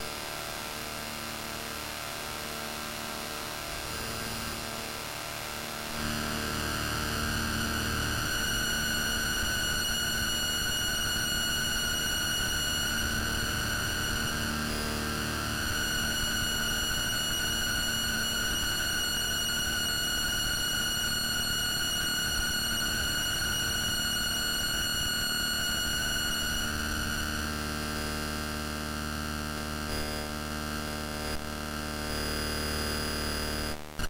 Train Still On Synthetics Long Ride
crackle, ether, fx, noise, screech, soma, train